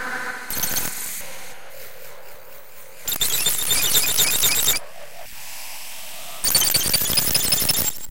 2-bar ambient pad with a glitchy foreground that varies slightly in pitch
2-bar, ambient, click, electronic, glitch, industrial, pad, pitched, processed, rhythmic, sound-design